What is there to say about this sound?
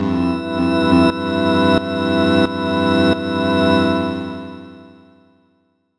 a house chord thing
space, synthetic, chord, house